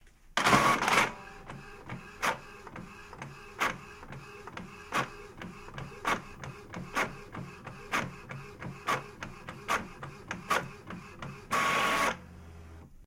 printing inside an office room